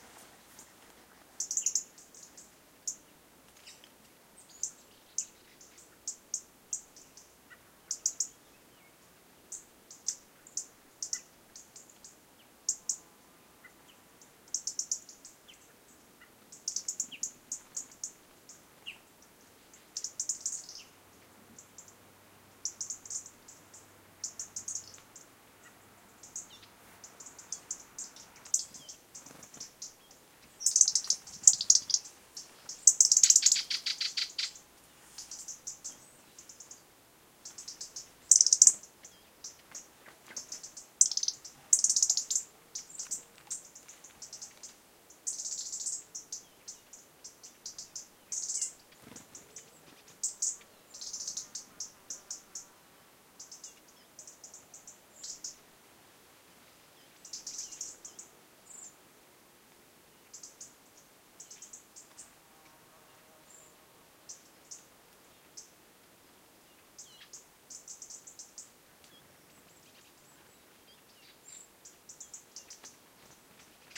20061230.little.bird
call of a little bird. Don't know which species, maybe some kind of Warbler
birds, chirps, field-recording, nature, south-spain, winter